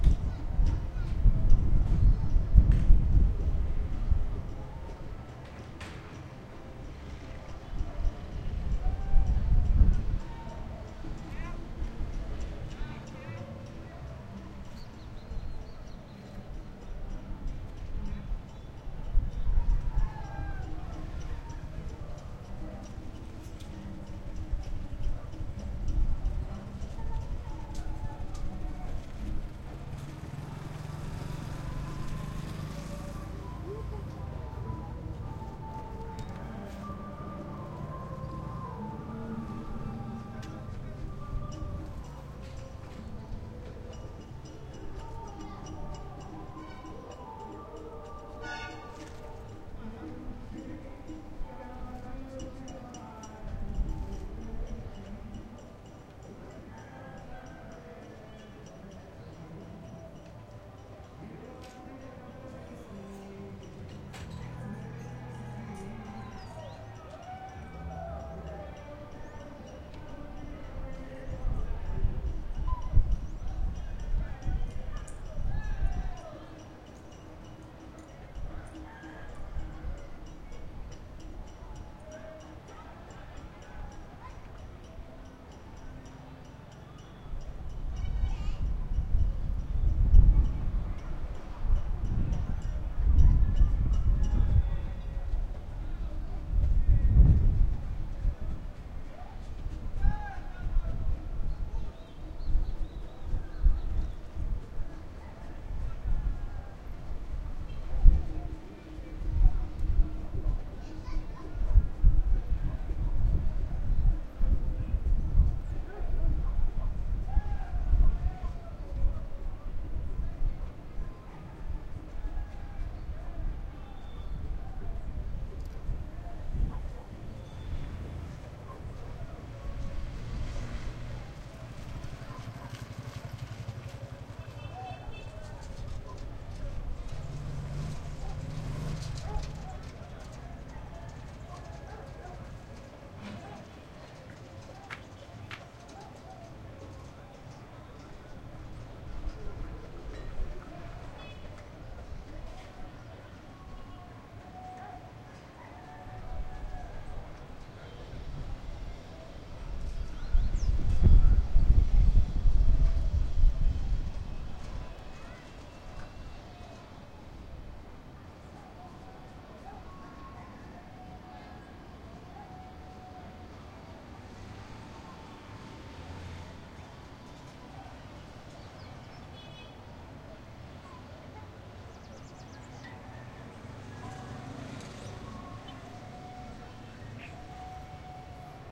Em frente à Igreja do Rosarinho
Sábado à tarde. Igreja fechada, alto fluxo de pessoas, homem descendo a ladeira com carro de mão, crianças brincando ao lado da igreja e um samba rolando embaixo da ladeira.
Gravado por Álex Antônio
Equipamento: gravador Zoom
Data: 28/março/2015
Hora: 16h45
Saturday afternoon. Church closed, high flow of people, man down the slope with wheelbarrow, children playing next to the church and a samba playing down the slope.
Recorded by Alex Antonio
Equipment: Zoom recorder
Date: March/28 / 2015
Time: 4:45 p.m.
samba, Rosarinho, kids, moto